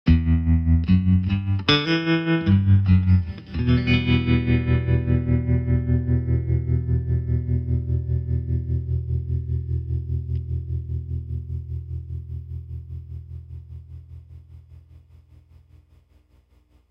Tremolo and twang guitar #2
Another little eminor detective type film guitar line with tremolo
filmnoir, guitar, tremolo, twang